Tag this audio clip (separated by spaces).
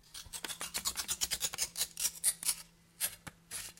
random scrapes thumps